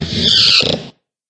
The Big Squeak (8) Break
Okay, about this small bibliothek there is a story to tell.
Maybe a year ago my mother phoned me and asked if I could give her a hand because the door to her kitchen was squeaking.
After work I went to her, went down to the cellar, took the can with the lubricating grease, went upstairs and made my mother happy.
Then I putted the grease back and went upstairs. Whe sat down, drank a cup of coffee. Then I had to go to the toilet and
noticed that the toilet door was squeaking too. So I went down to the cellar again and took once again the grease.
Now I thought, before I make the stairs again, I'll show if any other thing in my mothers house is squeaking.
It was terrible! I swear, never in my entire life I've been in a house where so many different things were squeaking so impassionated.
First off all I went back to my car and took my cheap dictaphone I use for work. And before I putted grease on those squeaking things I recorded them.
comic, game, moving